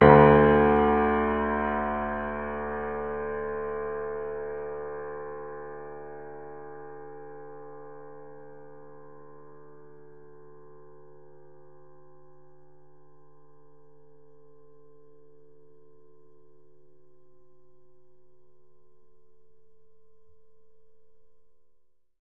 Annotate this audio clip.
MISStereoPiano
These were made available by the source listed below.
You may also cite as a reference, link to our page from another web page, or provide a link in a publication using the following URL:
Instrument Piano
Model Steinway & Sons
Performer Evan Mazunik
Date November 5 & 27, 2001
Location 2017 Voxman Music Building
Technician Michael Cash
Distance Left mic 8" above center bass strings
Right mic 8" above center treble strings